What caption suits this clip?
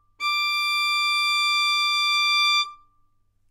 overall quality of single note - violin - D6
Part of the Good-sounds dataset of monophonic instrumental sounds.
instrument::violin
note::D
octave::6
midi note::74
good-sounds-id::1501
multisample, good-sounds, violin, single-note, neumann-U87, D6